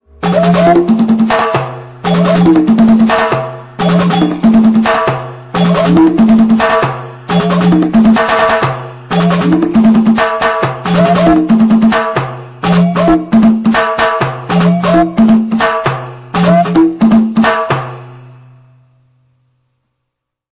From my Roland 'Latin' patch. Plese comment on what you will use it for. Thanks. :^)